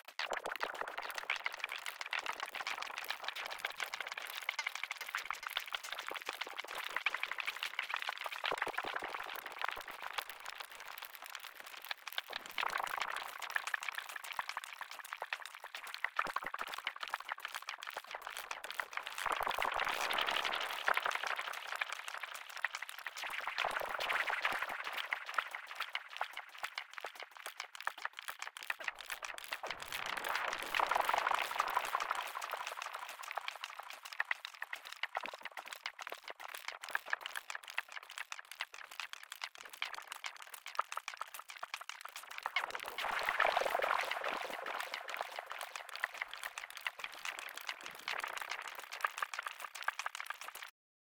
water clickums
Liquidy water click sounds processed with delay.
clicks, liquid